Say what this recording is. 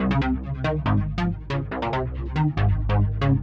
a simple bassline with some delay and more distortion.
bass, bassline, beat, distorted, synth, techno, trance